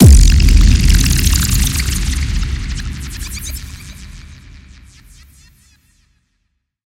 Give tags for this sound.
140
4
bang
bars
boom
bpm
break
climax
club
dance
downlifter
drop
electro
handsup
hardcore
hardstyle
hit
house
techno
trance

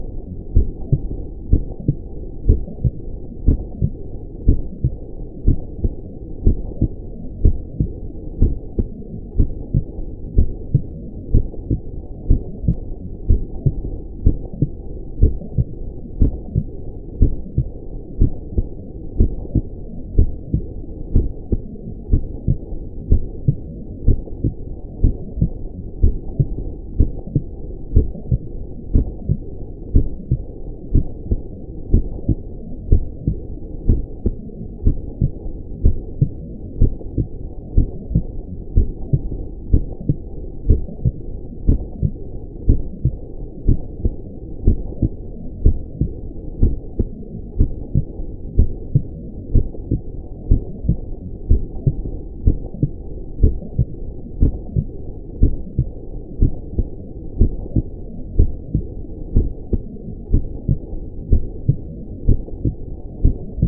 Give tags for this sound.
61bpm
heartbeat
heart
mono
blood
heart-beat
stethoscope
human

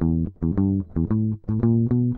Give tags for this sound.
acid
apstract
funk
fusion
groovie
guitar
jazz
jazzy
licks
lines
pattern

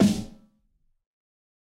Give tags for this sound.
drum
fat
god
high
realistic
snare
tune

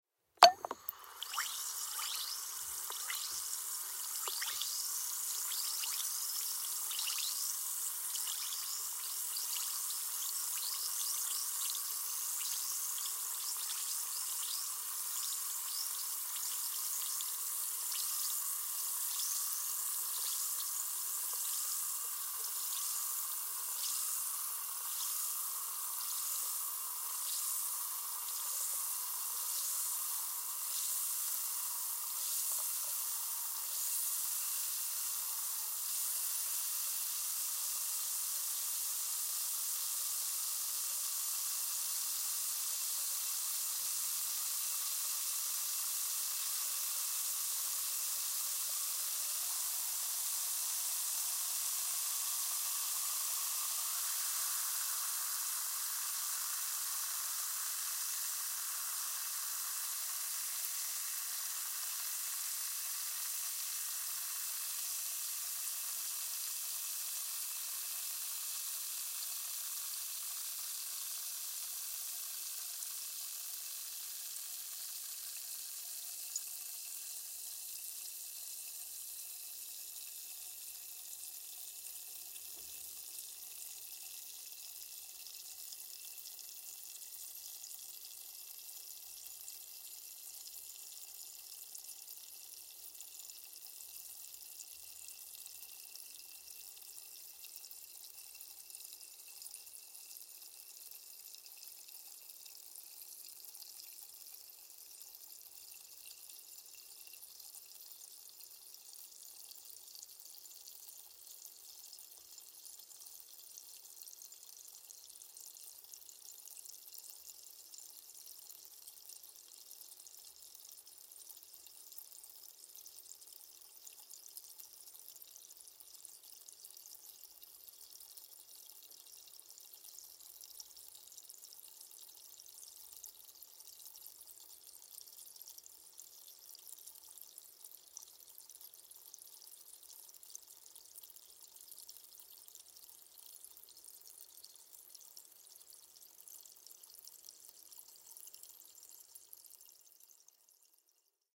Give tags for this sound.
dissolve
water

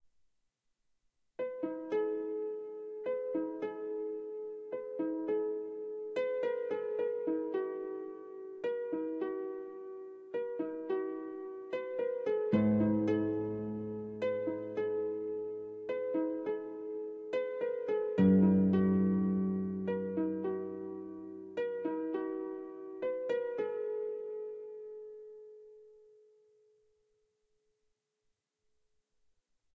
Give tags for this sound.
music
harp
synth
atmosphere

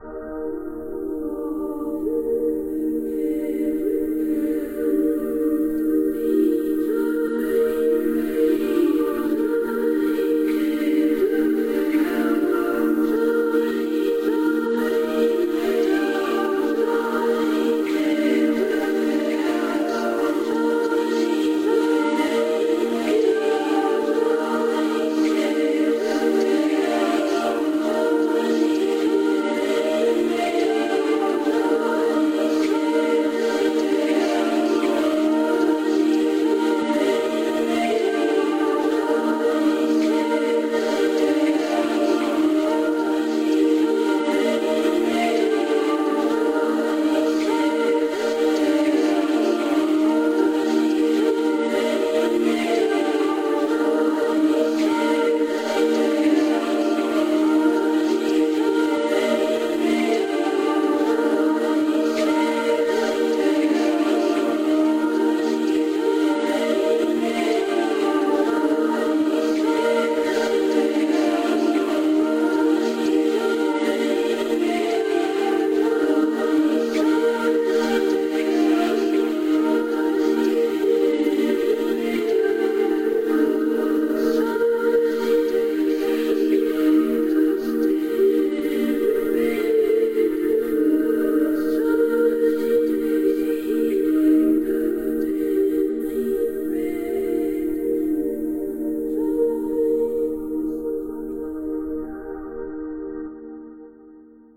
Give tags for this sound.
singing
prayer
lords

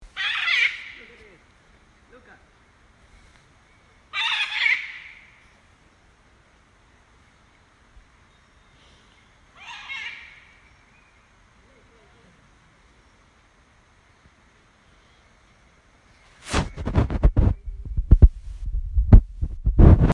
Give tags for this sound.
Ara
Jungle
parrot

